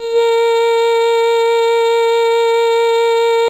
yyyyyyyyy 70 A#3 Bcl
vocal formants pitched under Simplesong a macintosh software and using the princess voice
vocal, synthetic, voice, formants